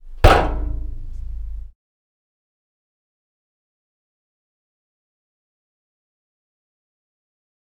Metal Knock 2
A knock on a piece of metal using a fist. A variation.
fist, hit, knock, metal